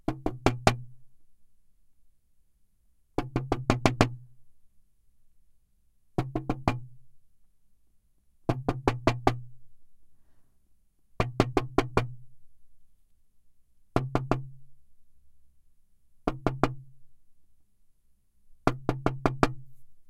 Toc-toc sur la vitre d'une porte en bois.
door, glass, porte, son, sound, tic, toc, verre, vitre